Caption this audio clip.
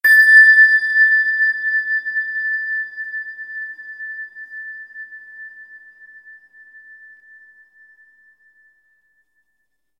Synthetic Bell Sound. Note name and frequency in Hz are approx.
sfx, synth